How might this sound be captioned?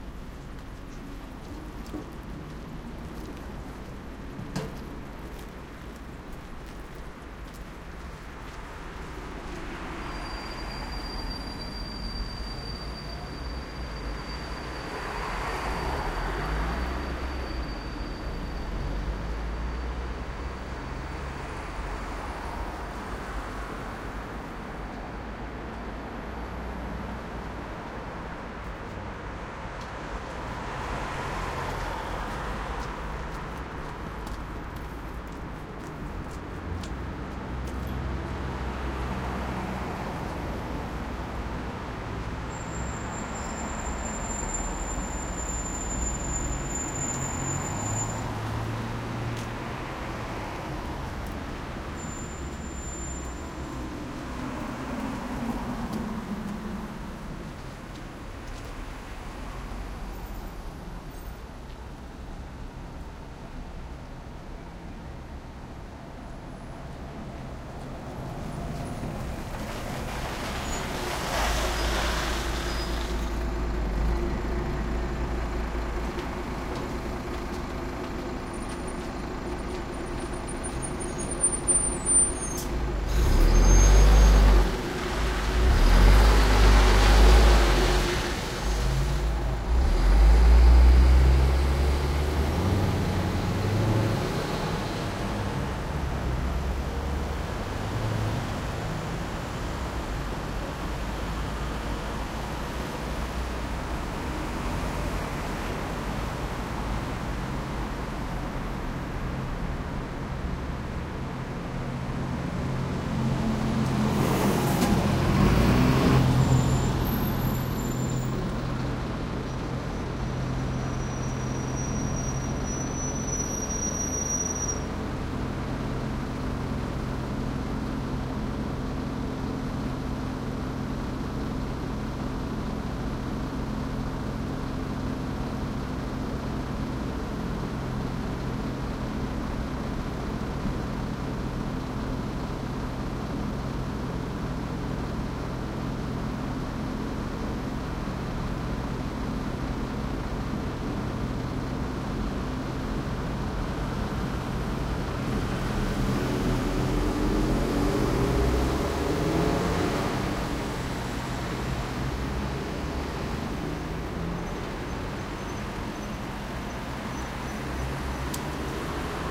Bus Stop Gerichtstraße in Berlin at Night
Sitting at a bus stop, a person digs through trash, looking for recyclable bottles. People pass by, cars pass by, a bus comes and stops, another truck passes by...
Recorded with a Zoom H2.
urban, car, cars, noise, trucks, trash, truck, industrial, night, bus, infrastructure, transportation, buses, berlin, loud